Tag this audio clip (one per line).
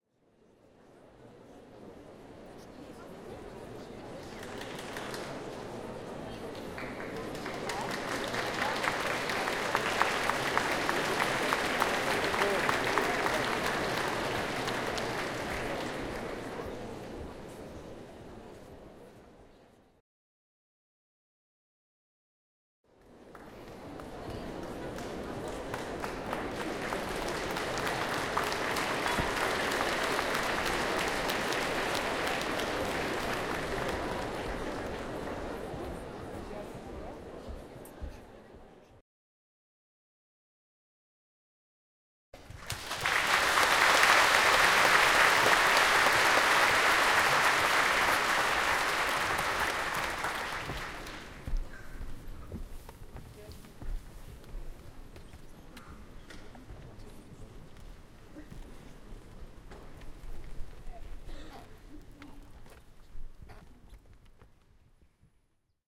loud
performance
clap
polite
cheer
show
cheers
audience
people
foley
crowd
applause
theater